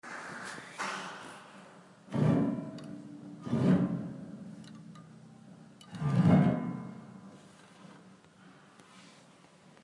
Piano Keys
I played some keys on a random baby grand piano I found in the basement of a large building.
abandoned, baby, background-sound, creepy, drama, dramatic, echoes, eerie, ghost, grand, hallway, haunted, horror, Keys, music, nightmare, phantom, piano, scary, sinister, spooky, suspense, terrifying, terror, weird